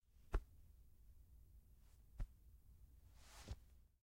Pat on the shoulder
Someone patting someone on the shoulder